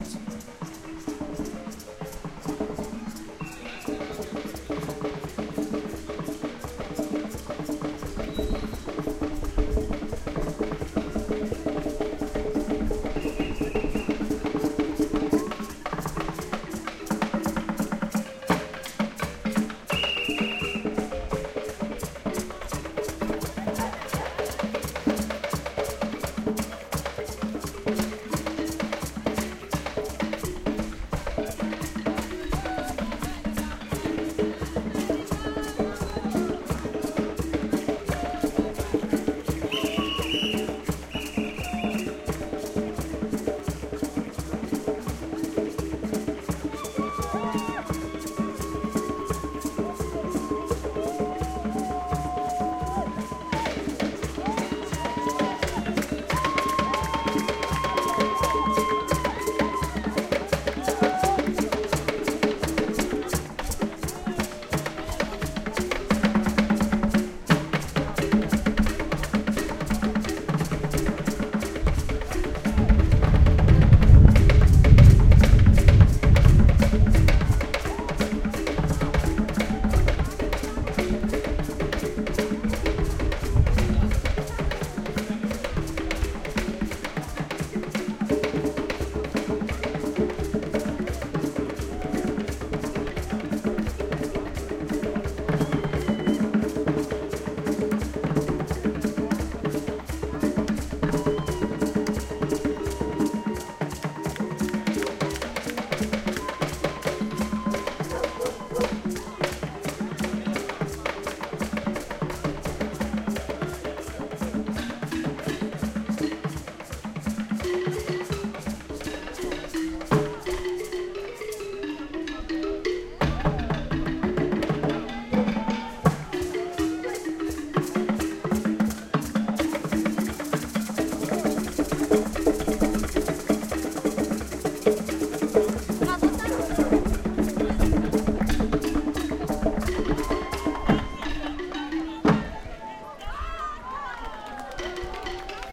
senegal music montpellier-011
Street musicians from Senegal at Montpellier
field-recording, montpellier, percussion, senegal, street-music